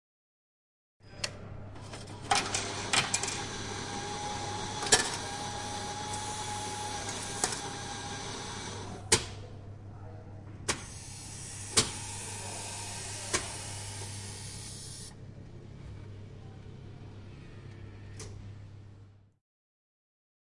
Coffe machine
This sound represents a coffee machine when a person throws some coins and choose a drink.
campus-upf, Coffee, Coins, Drinks, Machine, Tallers, UPF-CS14